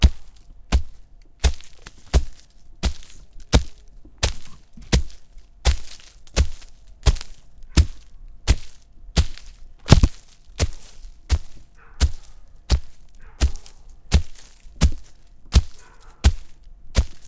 Stomping through the forest. Breaking snapping twigs. rustling leaves
foot, forest, steps, stomps, tromp, walk